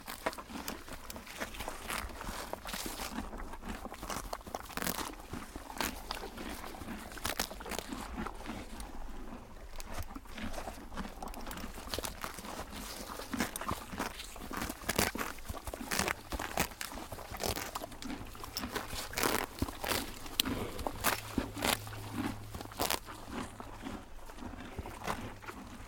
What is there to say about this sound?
Horse eating and chewing grass in exterior, recorded at Kuhhorst, Germany, with a Senheiser shotgun mic (sorry, didn't take a look at the model) and an H4N Zoom recorder.
countryside, eating, exterior, horse